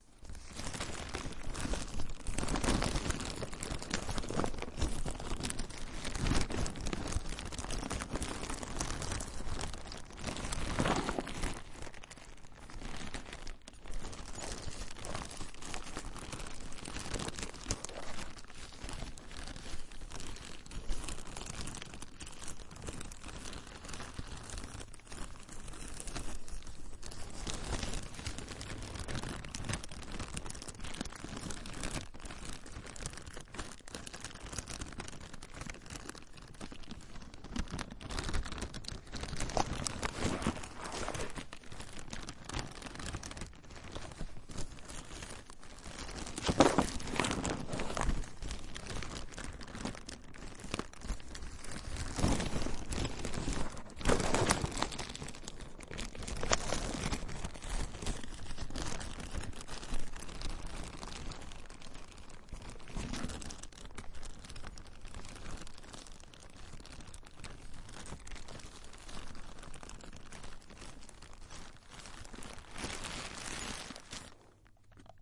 Ambient scrunching of a paper bag. Stereo Tascam DR-05